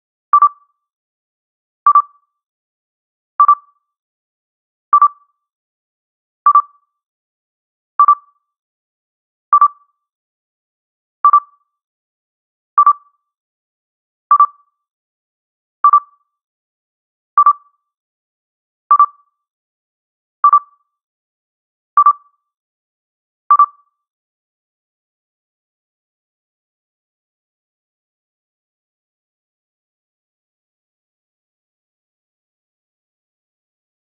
scanner blip
This is almost identical to a scanner sound on one of my sound effects CDs. I matched the pitch and wave shape using the FM synth and various tools in Sound Forge 8. I started with a .06 second sine wave at 1.2Hz - dropped it a bit.